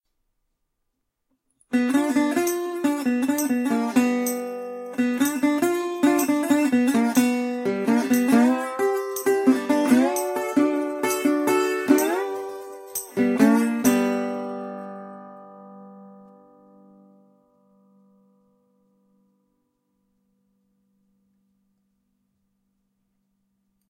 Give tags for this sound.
Slide-guitar; introduction; blues

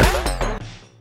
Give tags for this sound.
Alien; Ambient; Audio; Background; cinematic; click; Dub; Dubstep; Effect; Electronic; Funny; game; Machine; movie; Noise; pop; project; Sci-Fi; Sound; Spooky; Strange; Synth; track; video; Weird; Whirl; whoosh

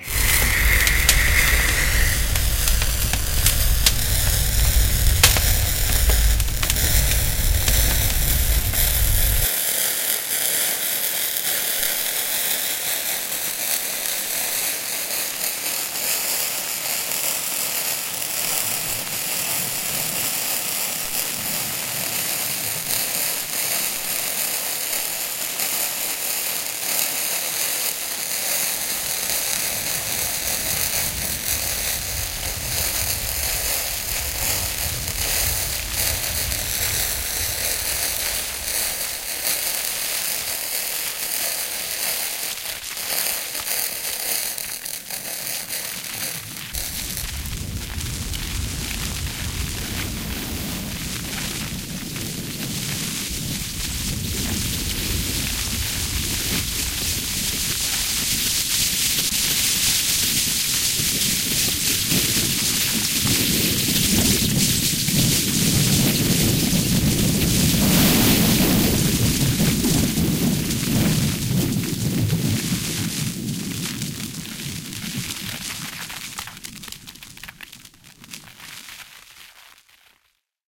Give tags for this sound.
bunch; sounds; fire

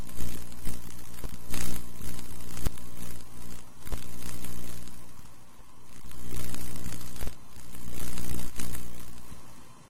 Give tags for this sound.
broken; bulb; flicker; Flickering; light; malfunction

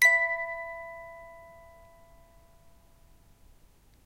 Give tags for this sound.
bell; box; tones

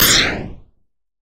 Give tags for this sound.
sf; sounds; game; attacking; attack; video; games

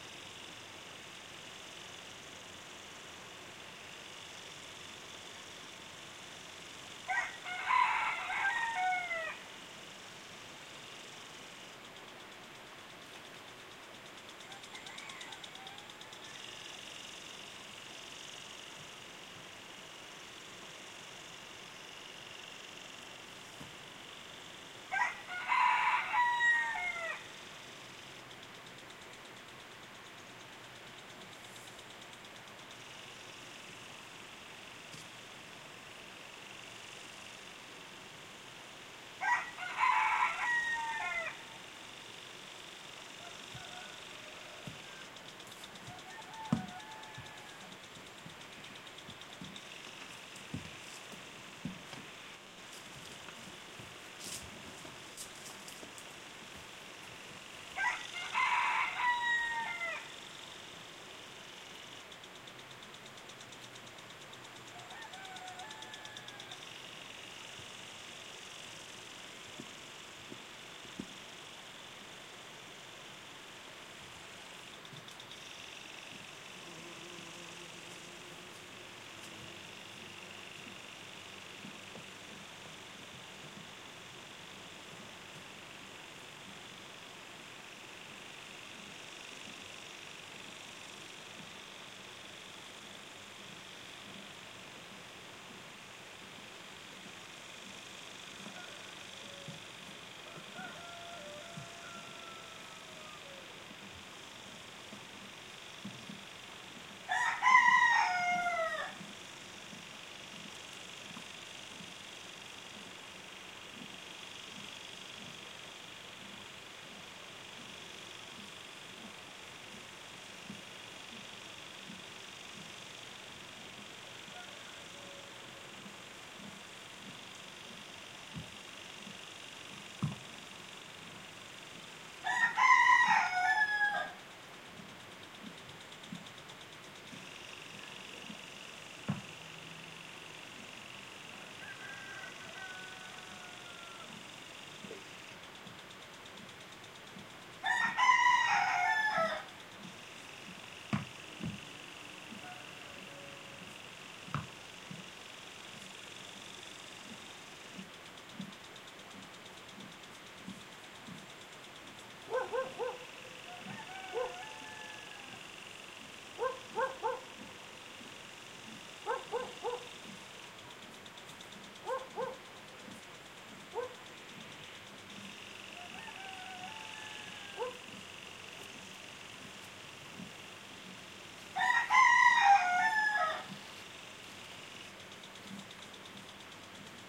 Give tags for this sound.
barkings; cock-a-doodle-do; country; dogs; farm; field-recording; hen; irrigation; kikiriki; lawn; morning; nature; rooster; rural; summer

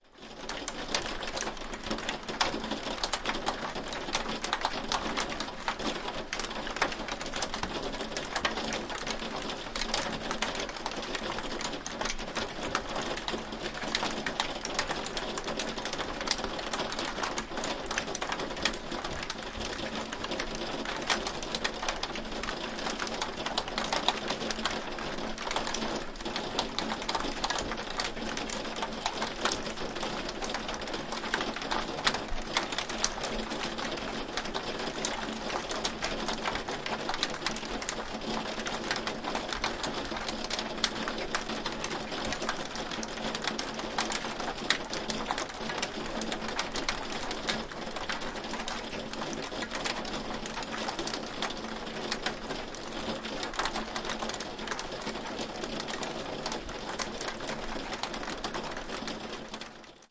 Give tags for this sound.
window; hail; light; interior; inside

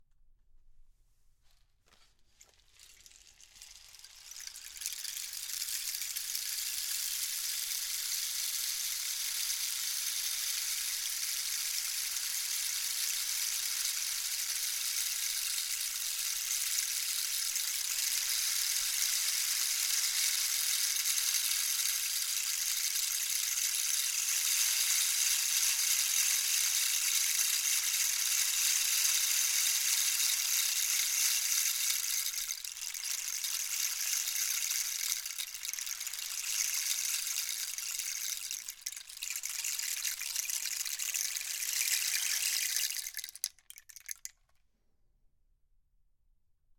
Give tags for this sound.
Sony; ethnic; studio-recording; South-American; contact-mic; cactus; studio; PCM-D50; DYN-E-SET; microphone; ethnic-instrument; wikiGong; contact; instrument; Schertler; rain-stick

